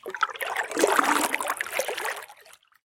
Water Paddle med 012
Part of a collection of sounds of paddle strokes in the water, a series ranging from soft to heavy.
Recorded with a Zoom h4 in Okanagan, BC.
field-recording, lake, river, splash, water, zoomh4